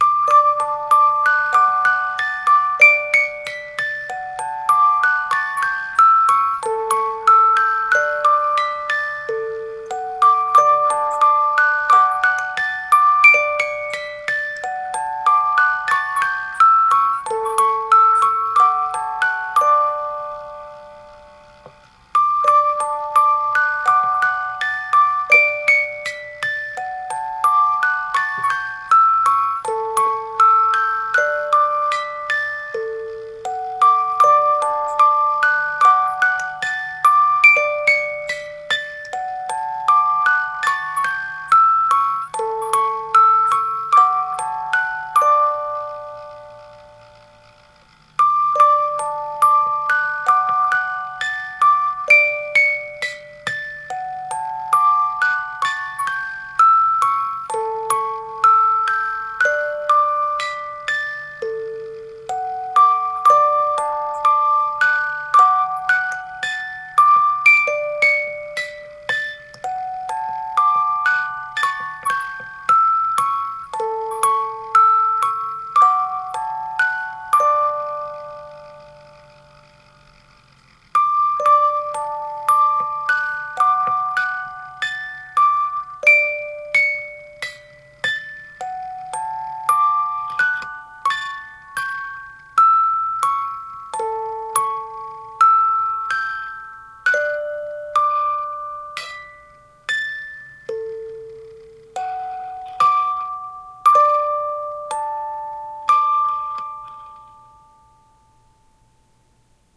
Ben Shewmaker - Music Box
Recording of a music box I own.
music, mechanical